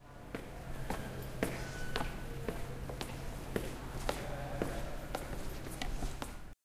This sound is when someone walks inside the building.